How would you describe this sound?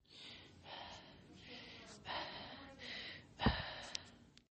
35. Respiración agitada
Heavy heavy heaaavy breathing
heavy, lol, breathing